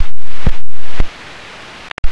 I have tried to (re)produce some 'classic' glitches with all sort of noises (synthetic, mechanic, crashes, statics) they have been discards during previous editings recovered, re-treated and re-arranged in some musical (?) way because what someone throws away for others can be a treasure [this sound is part of a pack of 20 different samples]
processed, click, contemporary, synth, digital, glitch, electro, effect, static, abstract, noise